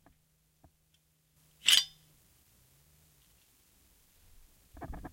Crappy Replica of a Civil War Union cavalry sword. All of these are rough around the edges, but the meat of the sound is clear, and should be easy enough to work with.